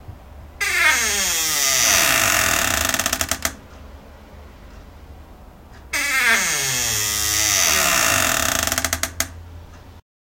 slow door hinges nm
2 alternate slow creaky door hinges. recorded from a kitchen pantry cupboard on a rode ntg3.
creak, creaky, creepy, door, eerie, haunted, hinge, horror, scary, sinister, spooky, suspense